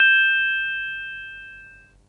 Casio 1000P Preset - Chime C
Preset from the Casio Casiotone 1000P (1981), C Note, direct recording converted to stereo
Additive, bell, Casio, casiotone, chime, CT1000p, synthesizer